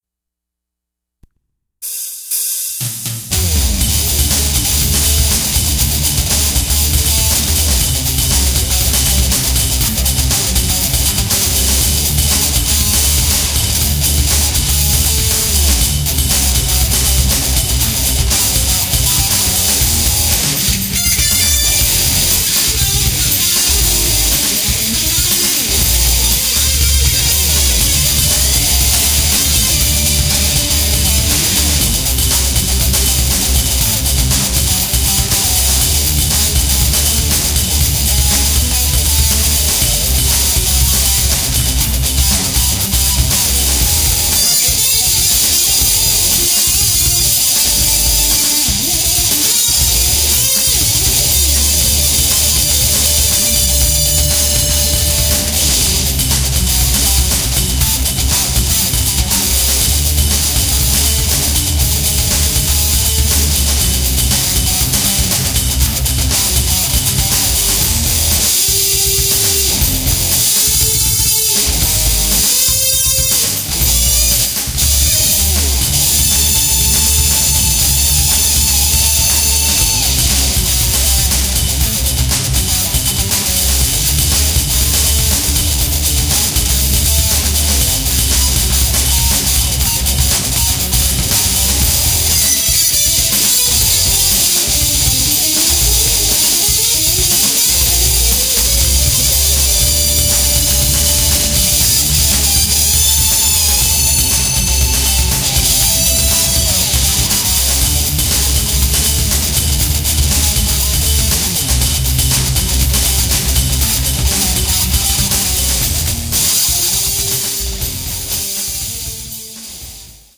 Drive Clip
All the music on these tracks was written by me. All instruments were played by me as well. If you would like to check out my original music it is available here:
TRAXIS The-Road-to-Oblivion
Original, Traxis